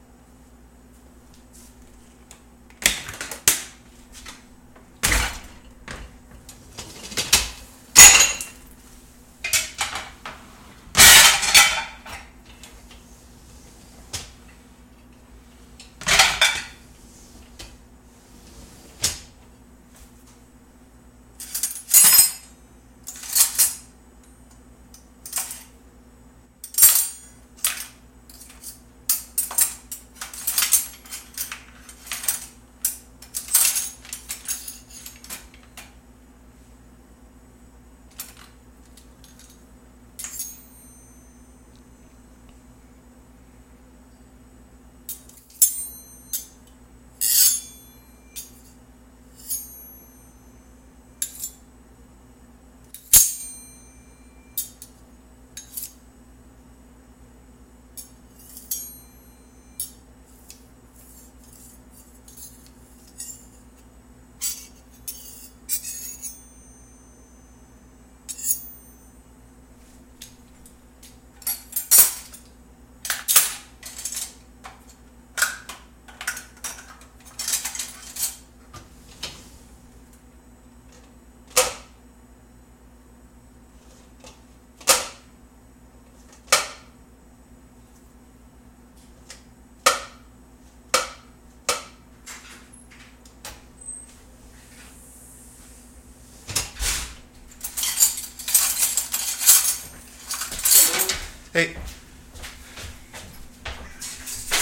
opening the dishwasher and rattling some dishes for sounds for my stop-motion.